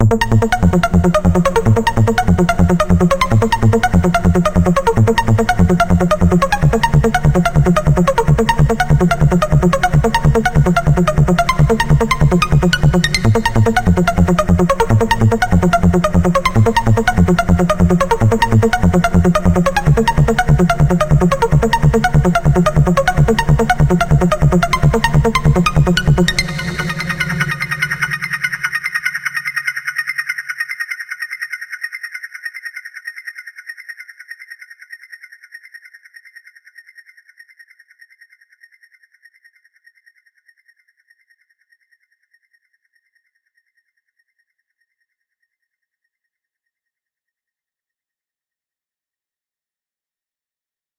Trance Loop 3
Serum Pluck more punch